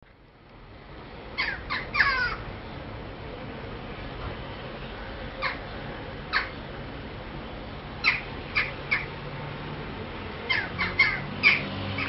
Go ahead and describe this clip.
crow bird
**USE MY SAMPLES FREELY BUT
sounds, animal, bird